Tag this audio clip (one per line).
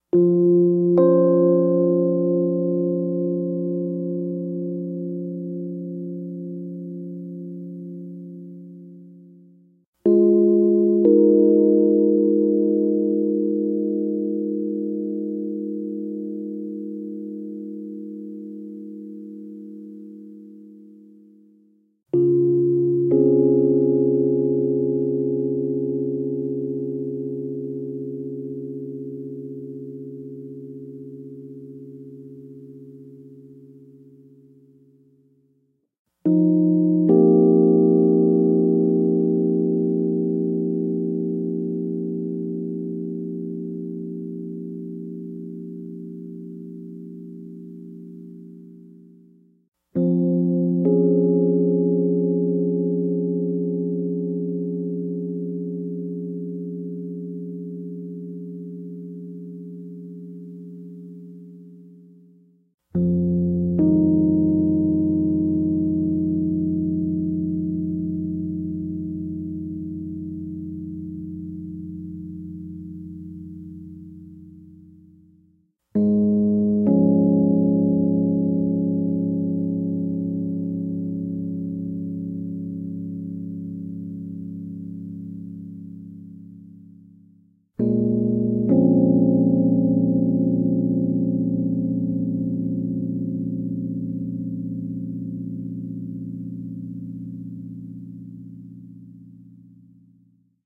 keyboard
piano
rhodes
tine